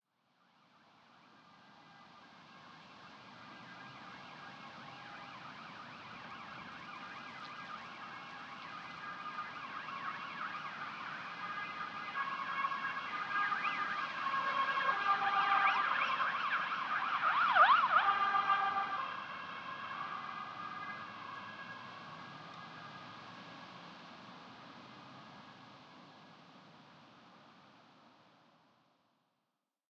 ambulance drive by